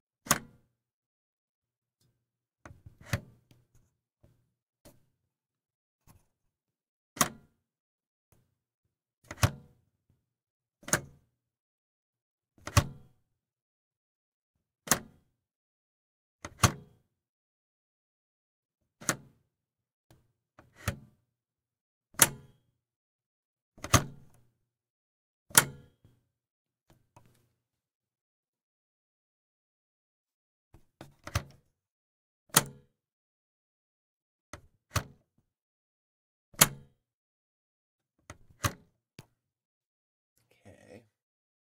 reel to reel tape machine tape speed switch button thuds and clicks
click, machine, reel, speed, switch, thud